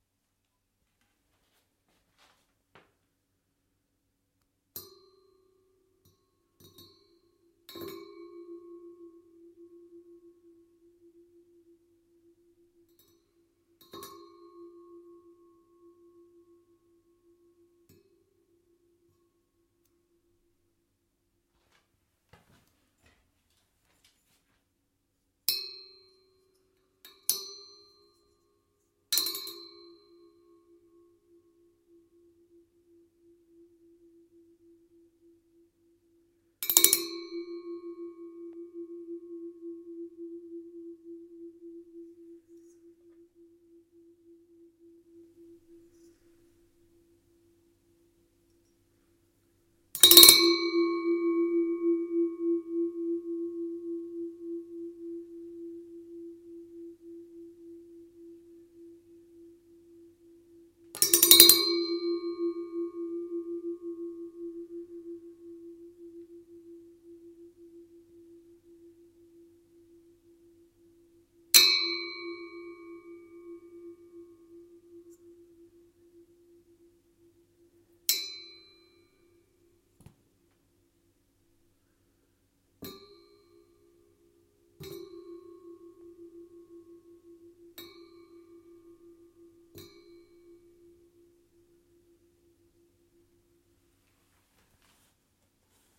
sounding of a metal gong, various approaches